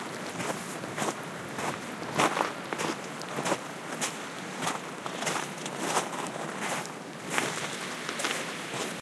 20070820.fjord.beach.footsteps
footsteps on damp gravel at a fjord beach in Greenland. Stream and rainfall in background. Recorded with a pair of Soundman OKM mics plugged into a Fel BMA1 preamp. Recorder was an iRiver H320.
environmental-sounds-research, field-recording, footsteps, gravel